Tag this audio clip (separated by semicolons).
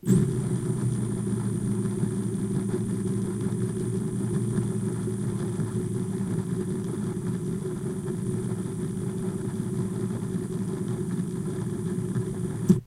Smash; Impact; Metal; Bang; Crash; Boom; Steel; Tool; Hit; Plastic; Friction; Tools